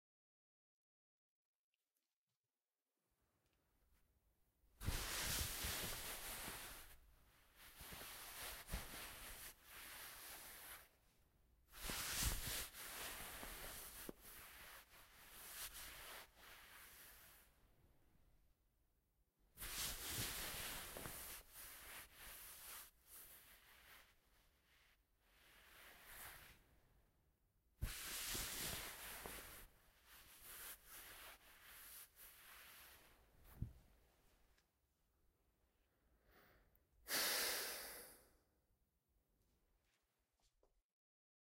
A denim jacket and a wool coat rubbed together to recreate the sound of a hug for an audio drama. Recorded in an iso booth with a large diaphragm condenser microphone and de-noised. (There's a sigh at the end, also for the purpose of the scene.)